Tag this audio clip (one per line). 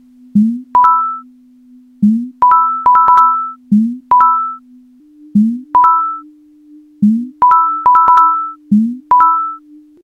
analog
arcade
coins
jump
videogame